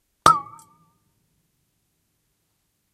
samples in this pack are "percussion"-hits i recorded in a free session, recorded with the built-in mic of the powerbook
boing, bottle, metal, noise, ping, pong, water